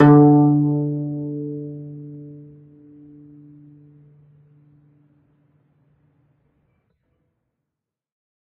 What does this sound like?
single string plucked medium-loud with finger, allowed to decay. this is string 9 of 23, pitch D3 (147 Hz).